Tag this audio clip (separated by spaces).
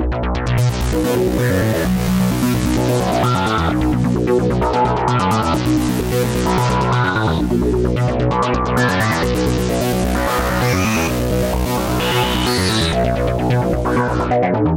130 130-bpm 130bpm acid bass bassline bpm club dance electro electronic eq house lead lfo line loop looper rave sequence sub synth techno trance